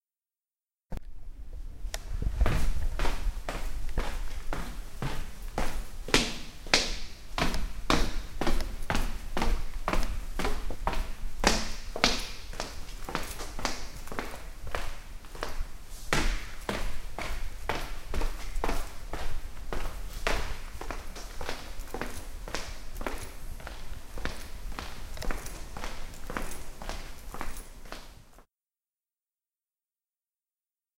This is a percussive sound produced when down stairs. This sound was recorded in the library of UPF.
down, campus-upf, UPF-CS13, downstairs